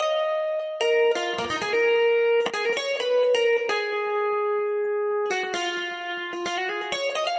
130BPM
Ebm
16 beats